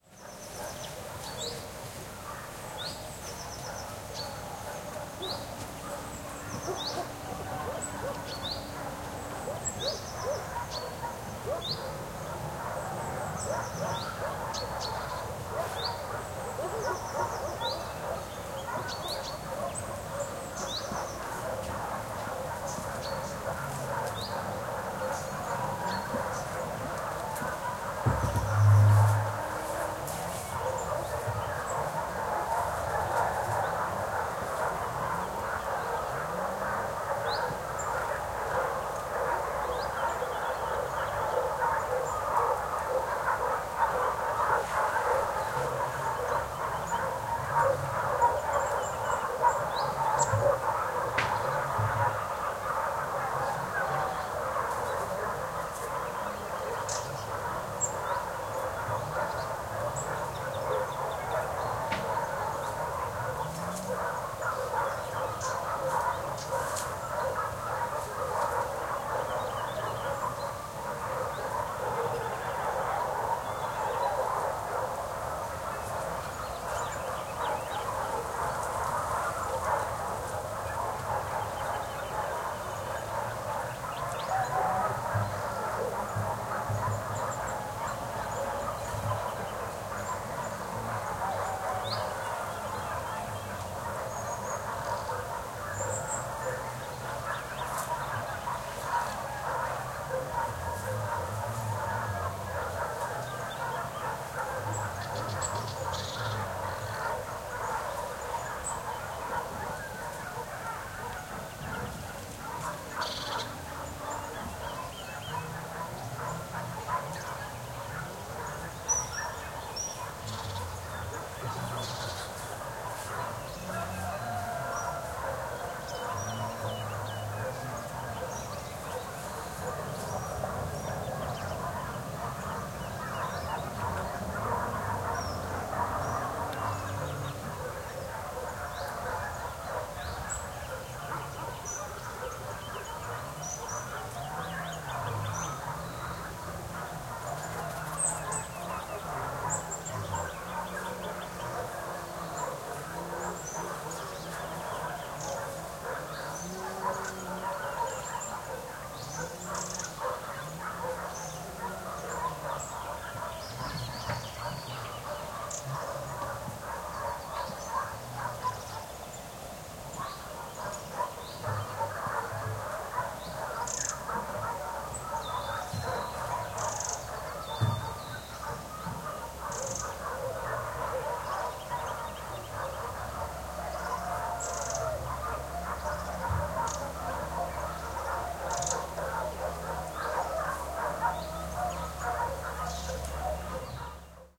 20181230 dog.pack.40
Village ambiance, with distant barkings of a large dog pack and close bird tweets. Recorded near Aceña de la Borrega (Caceres province, Extremadura, Spain). EM172 Matched Stereo Pair (Clippy XLR, by FEL Communications Ltd) into Sound Devices Mixpre-3 with autolimiters off.
dogs, countryside, barkings, village, field-recording